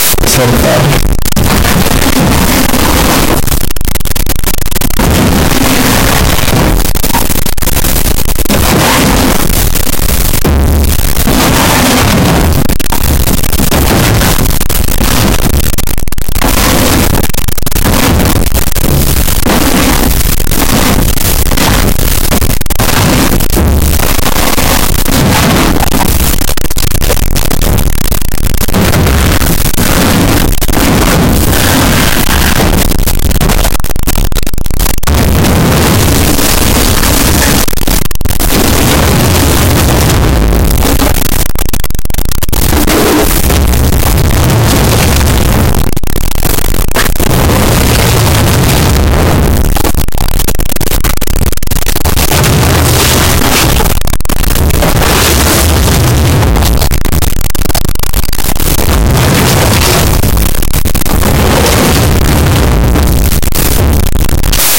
Drawers of different sizes and filled with different objects.
chlotes, drawers, sliding-drawers